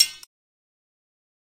Bottle Cap Glitch #4
a glitch in the system is an opening. a malfunction creates a perceptual crack where the once inviolable and divine ordering of life is rendered for what it is: an edifice, produced and maintained through violence and cruelty, a thin veneer that papers over its gaping emptiness. To take the glitch as invitation to invent anew; this is the promise of field recording capital's detritus.
Recorded with a Tascam Dr100.
field-recording, drum-kits, sample-pack